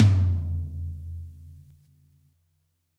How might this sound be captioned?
Middle Tom Of God Wet 009
drum, drumset, kit, middle, pack, realistic, set, tom